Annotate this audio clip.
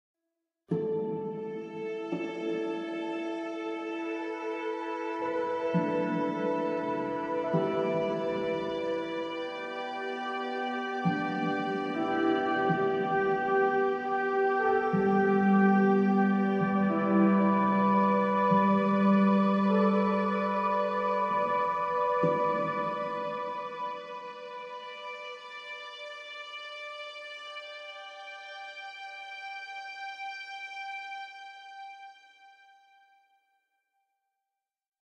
Relaxing Short Music
Peaceful calming short background music.
Trivia: Originally composed for demo tapes for a British short film.
calming, soundscape, hifi, ambient, ambiance, music, relax, short, peace, ambience, background, atmosphere, calm, strings